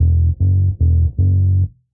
Modern Roots Reggae 13 078 Gbmin Samples